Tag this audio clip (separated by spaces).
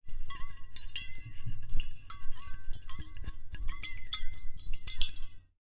Water Metal Shaking Splash Bubbles Shake Bottle